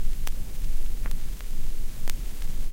FE noise
Vinyl record noise.
album, LP, vintage